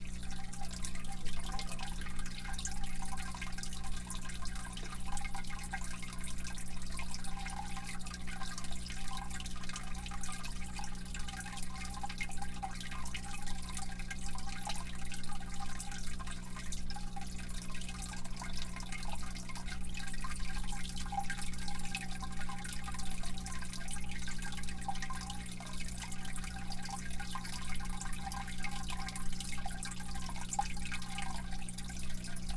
Aquarium recorded from the top.
bubbles, motor, water